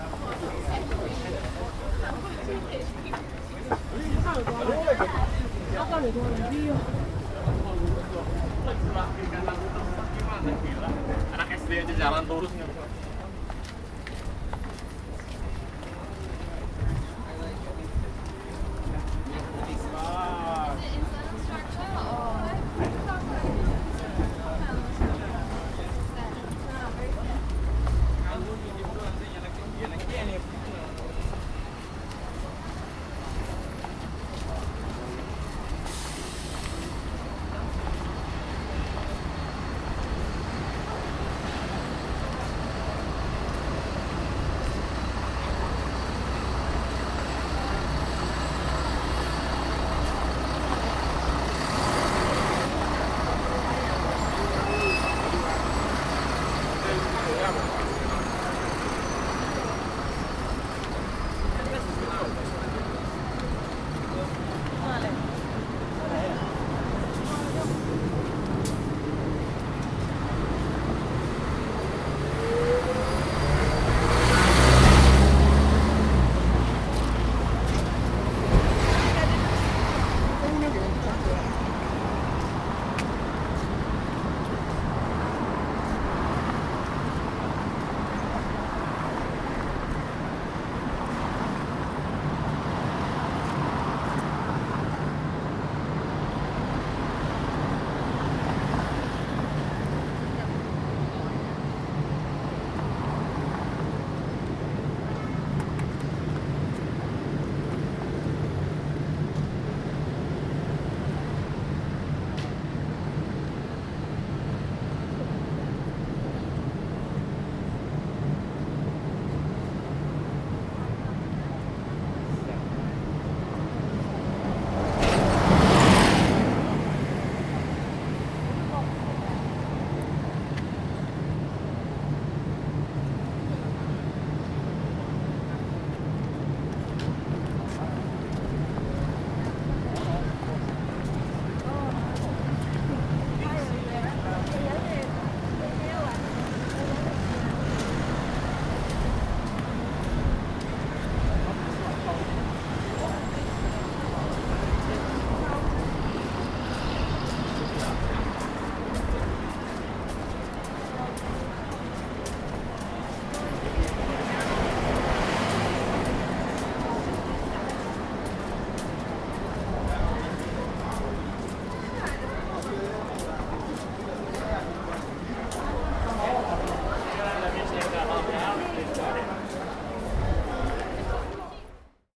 Singapore Street Scape
Busy post work street in Singapore. Starts with exit of underground onto street, walking footpath to wait at traffic lights and crossing street with traffic is stopped.